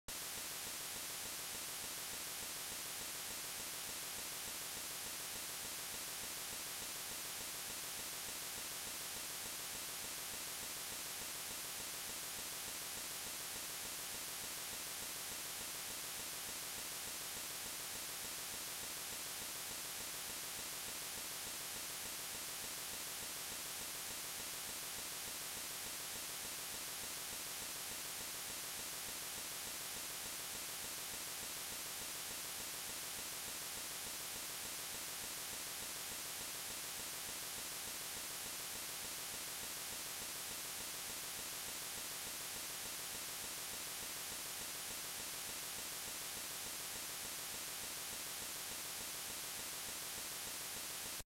A sound made in Famitracker that could be used to portray a light rain.